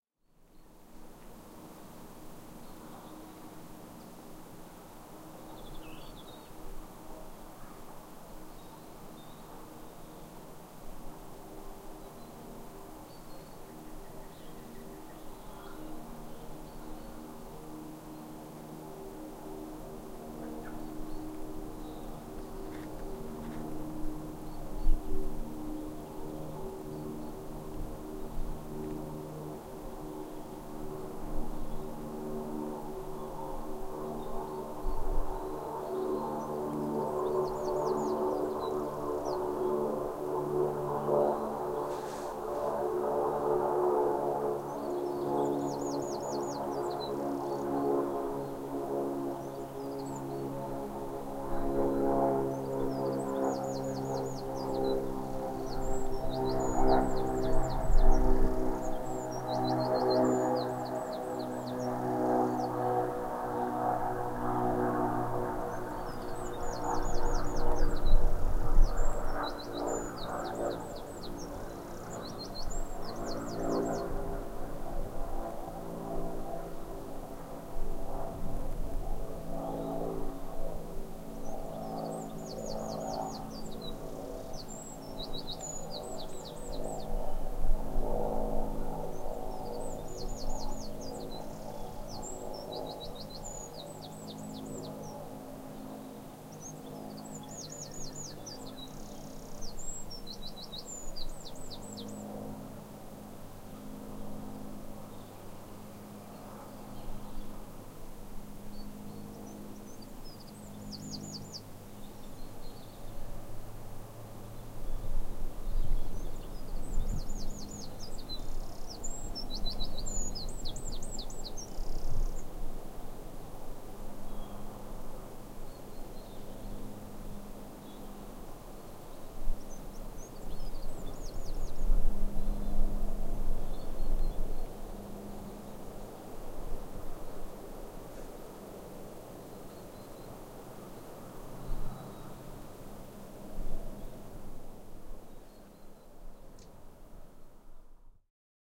Sk 310308 3 plane wren woodpecker
A spring day in late March 2008 at Skipwith Common, Yorkshire, England. The sounds of a wren, a distant woodpecker, and general woodland sounds including a breeze in the trees.
ambience, atmosphere, bird, bird-song, field-recording